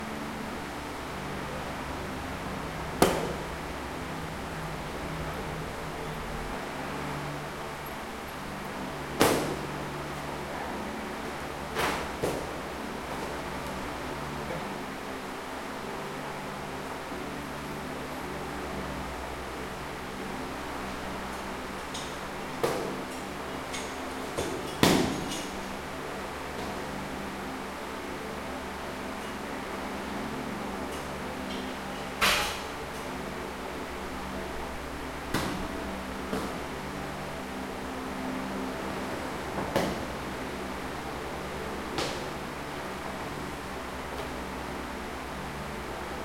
PAISAGEM SONORA 03-ACADEMIA LUTA
Paisagem sonora de academia captada com microfones internos do gravador Zoom H1; pertencente à categoria de Sons de Sociedade, de acordo com a metodologia de Murray Schafer, dentro do tema de sons de combate ou luta.
Gravado para a disciplina de Captação e Edição de Áudio do curso Rádio, TV e Internet, Universidade Anhembi Morumbi. São Paulo-SP. Brasil.